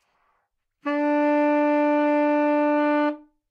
Sax Baritone - F2
Part of the Good-sounds dataset of monophonic instrumental sounds.
instrument::sax_baritone
note::F
octave::2
midi note::29
good-sounds-id::5300
baritone, F2, good-sounds, multisample, neumann-U87, sax, single-note